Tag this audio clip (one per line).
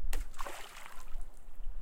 nature
splash
water